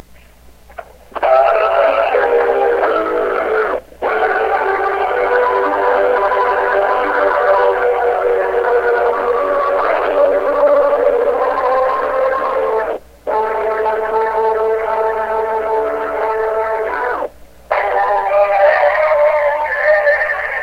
I was tried to make a tape loop, and this is what I got. For those who haven't tried, it's VERY difficult to make a tape loop. This one broke after two loops, so I'm lucky I recorded it!
cassette, weird, guitar, tape